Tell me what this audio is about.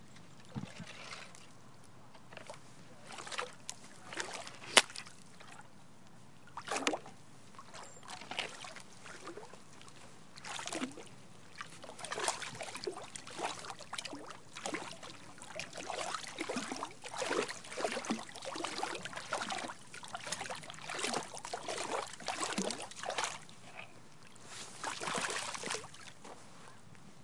Stick Splashing Water Around
A wooden stick stirring and splashing gentle flowing water.
Early morning, February 21 near Clark Fork River.
bath, field-recording, river, splash, stick, water